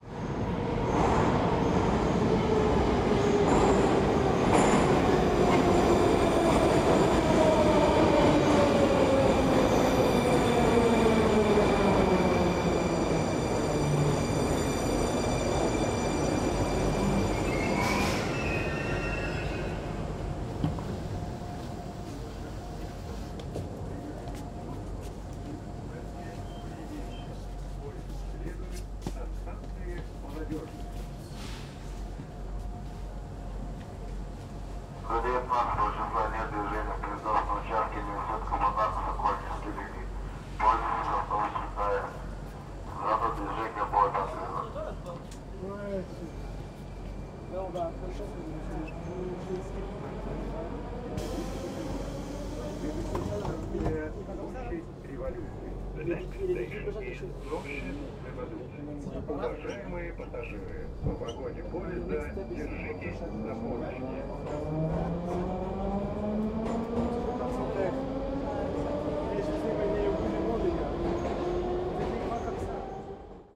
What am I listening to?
Train announcement in russian
ZOOM H6 + NTG 3